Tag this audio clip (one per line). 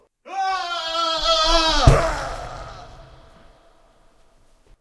fall
falling
impact
scream
screaming